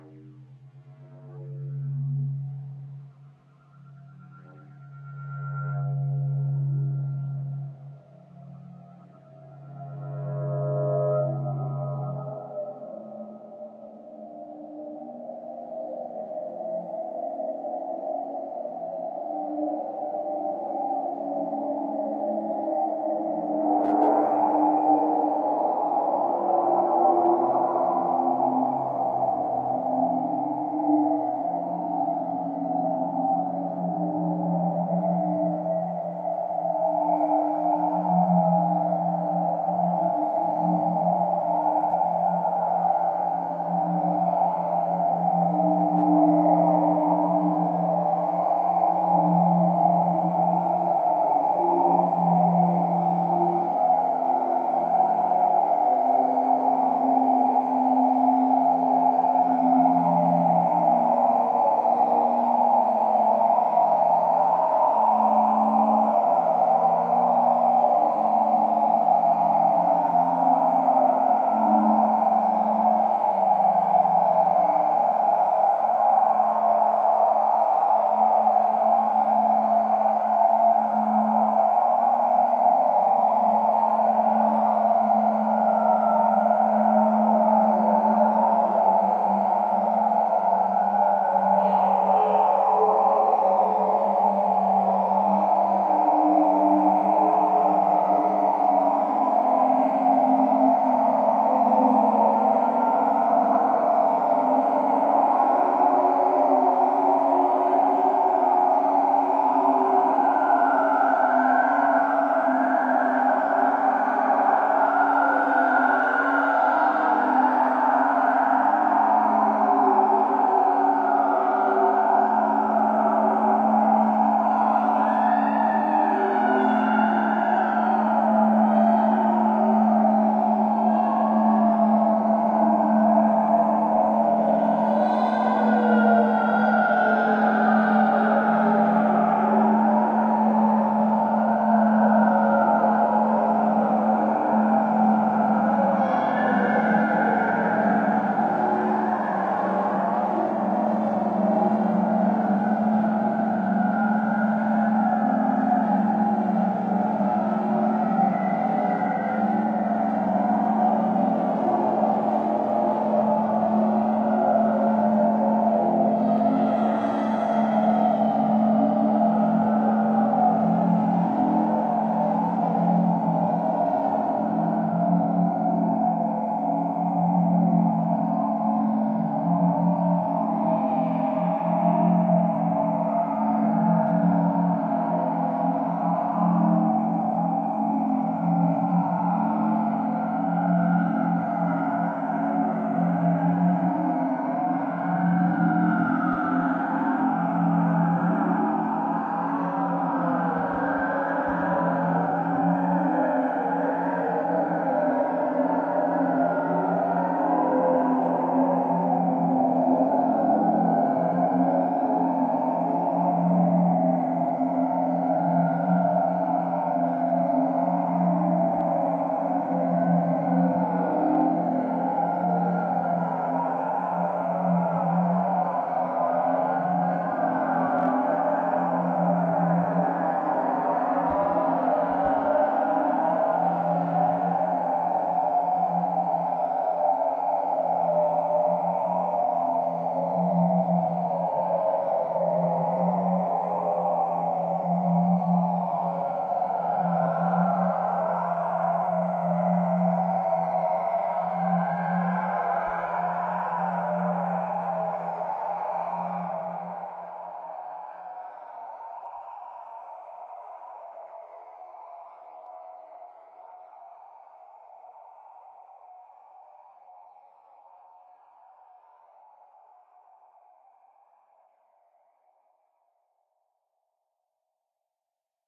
Unsettling, other-worldly sounds created by a reverb circuit with self-limiting feedback and rather extreme modulations on its delays (essentially, a "chorus" effect). It is invitingly interesting in some portions, creepy and downright scary in others. At around 1:51 you can hear some odd vocalizations mixed in to the input of the circuit to create some horror-like background effects, though in many places what sounds like screaming is actually just the sound of the circuit itself. The "circuit" is a virtual circuit I created in Analog Box 2, which created the sound output, but I also used Cool Edit Pro to mix together 4 different runs (one of which was long enough that I divided into two separate and distinct-sounding components). The waveform may look relatively uniform, and there are longish portions that sound mostly the same, but don't be fooled; it does have very different flavors over the course of time.
ambient, background, cinematic, horror, sci-fi, screaming, swirling, synthetic, turbulence